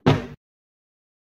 51-caida hojas
fall, paper, toon